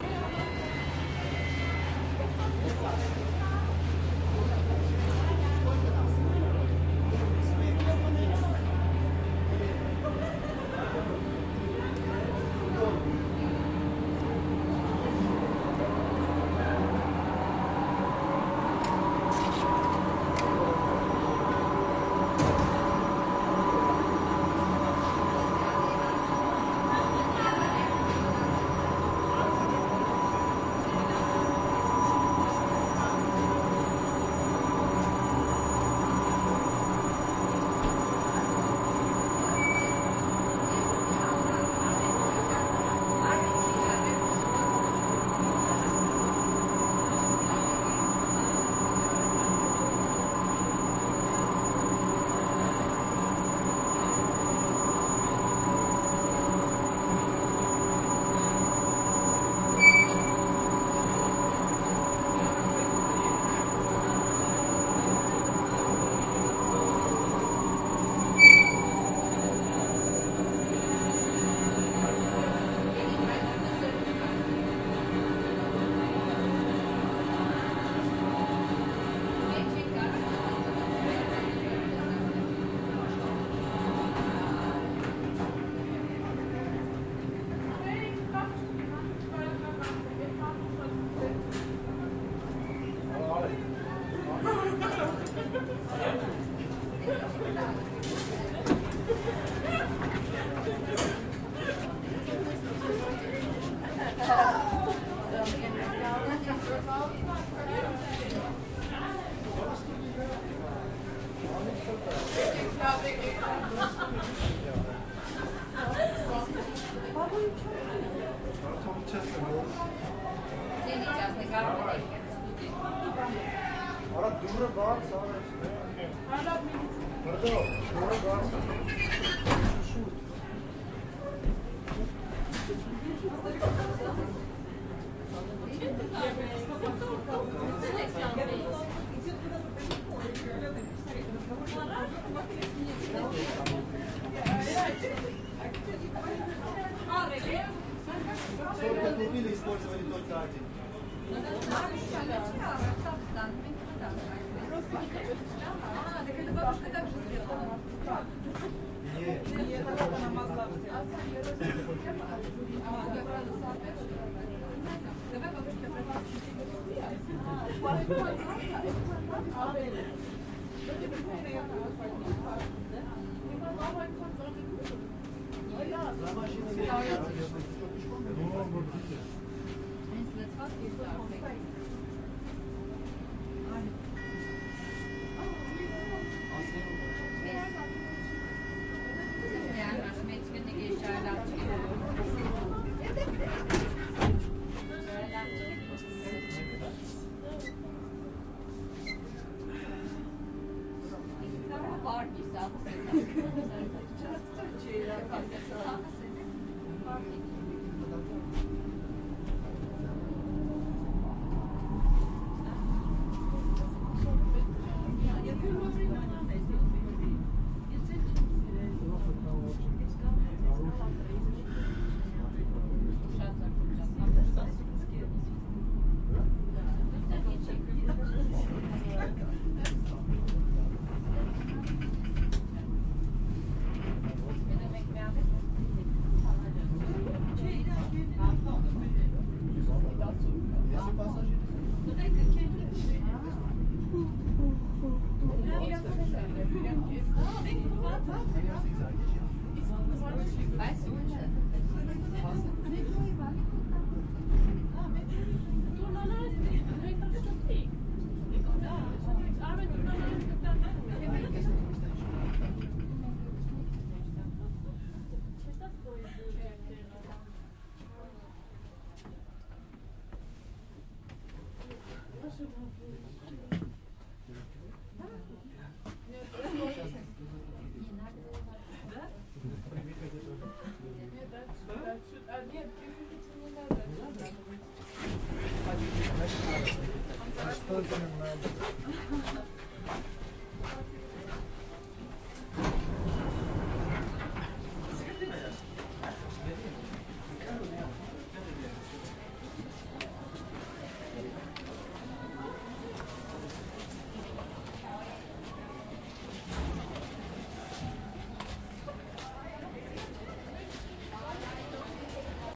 Ride on Montmartre funicular, Paris, France
A complete ride on the funicular in Montmartre, France. Highlights:
00:18-00:23-> ticket going through turnstile
00:15-01:26-> sound of hoist machinery operating as funicular approaches from below, recorded just outside the doors of the car while waiting for it to arrive
01:38-01:40-> entrance doors opening on near side of car
02:07-02:10-> exit doors closing on opposite side of car
03:04-03:10-> warning buzzer sounding before entrance doors close
03:11-03:14-> entrance doors closing
03:20-04:37-> car descends towards lower station
04:45-04:48-> exit doors open
04:53-04:55-> entrance doors open
Recorded on March 16, 2012.
france, funicular, inclined-railway, mass-transit, montmartre, underground